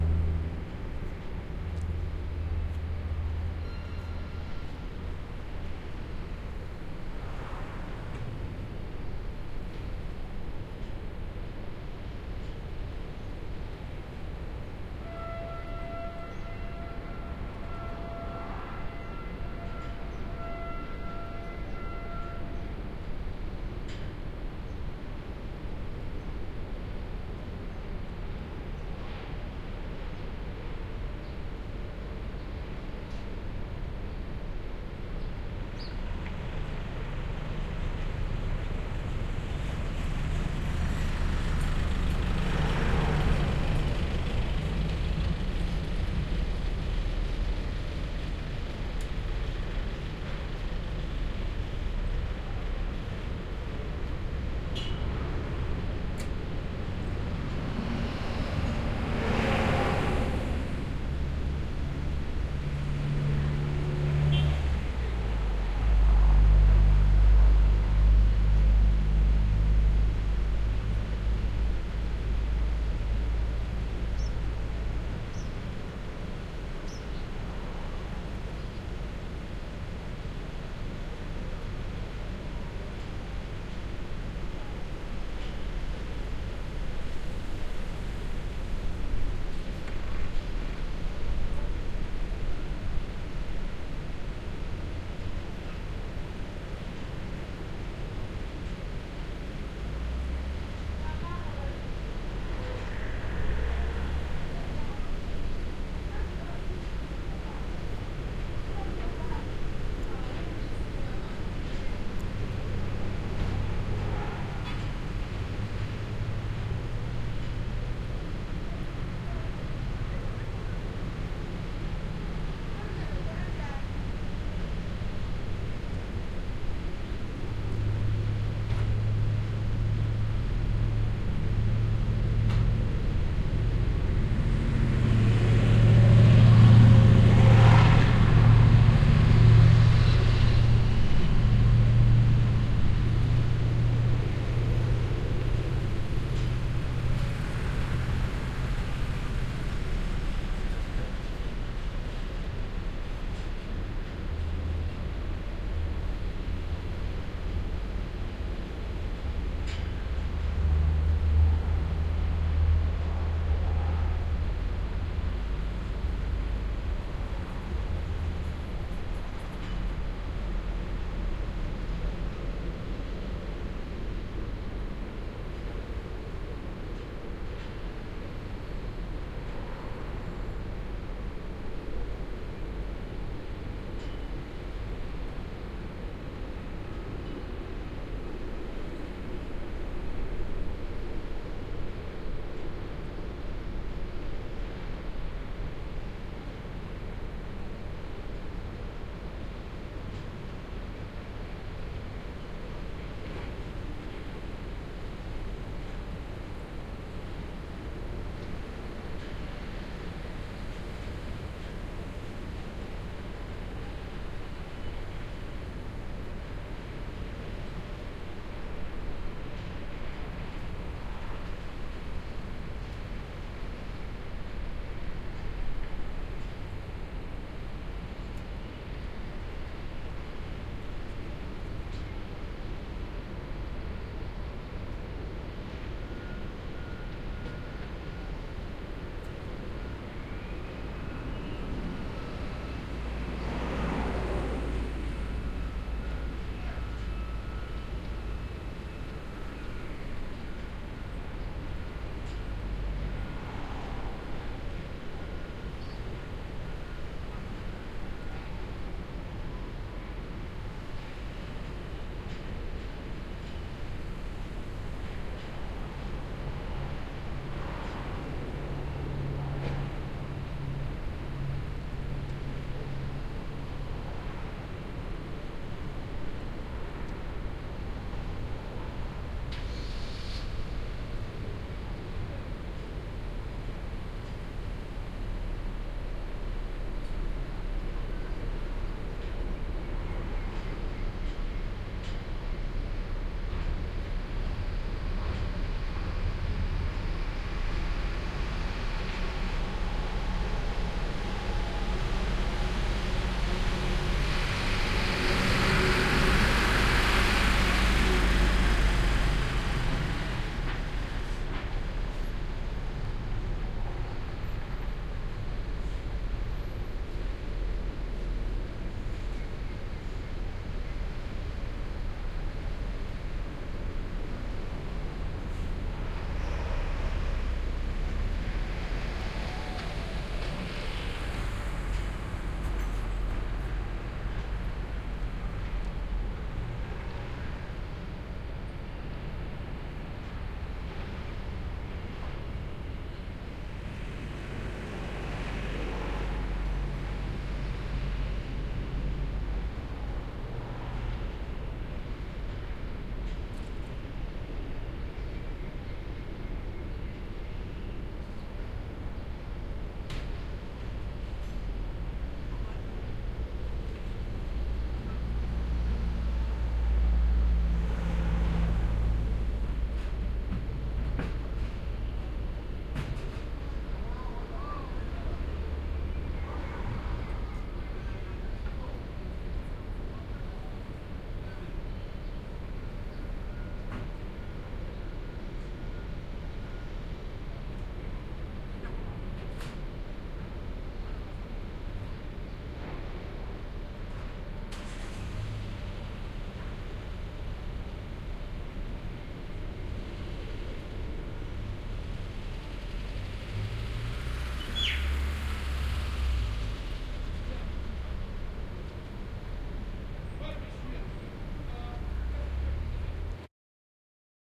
Steel Mill Daytime Ambience
Binaural sounds of a steel mill at the main gate, general backround noise can be heard, as well as traffic from a road just behind the recorder, also several train horn blasts from inside the factory can be heard.
Recorder used: Zoom H4N Pro and Sound Professionals SP-TFB2 binaural microphones.
ambience, ambient, atmos, atmosphere, background, background-sound, general-noise, hum, machines, Processing, soundscape, Steel, Steel-mill, truck, working